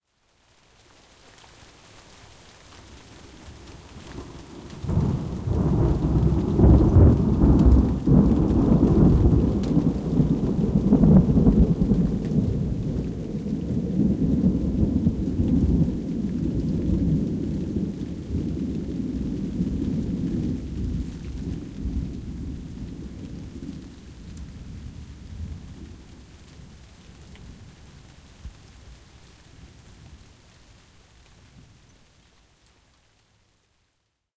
Thunder-Rolling-1
Rolling thunder. Can be used as FX.
Recorded to tape with a JVC M-201 microphone around mid 1990s.
Recording was done through my open window at home (in southwest Sweden) while this storm passed.
field-recording, rain